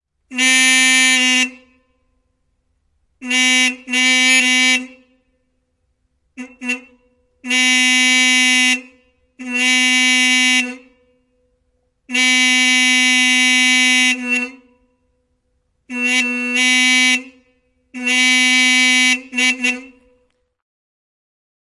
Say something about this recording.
Vanha traktori, äänimerkki / Old tractor, horn honking, Fordson Major a 1928-1951 model
Fordson Major vm 1928-1951. Traktorin torvi, äänimerkkejä.
Paikka/Place: Suomi / Finland / Vihti, Ylimmäinen
Aika/Date: 09.10.1993
Agriculture, Field-Recording, Finland, Finnish-Broadcasting-Company, Maanviljely, Maatalous, Soundfx, Suomi, Tehosteet, Yle, Yleisradio